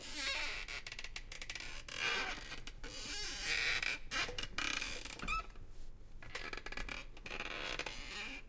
bored at work in the basement where the stashed me, i sit at my rusty desk chair with a stereo mic in hand, getting paid to do nothing.